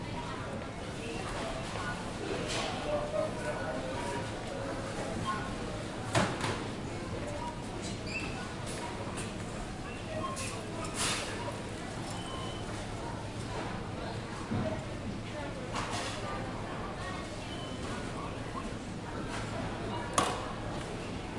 Shop background Tesco Store
Background in the Tesco Store recorded by Zoom